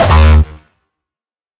Hardstyle Kick D#3
a Kick I made like a year ago. It has been used in various tracks by various people.
access, harhamedia, roland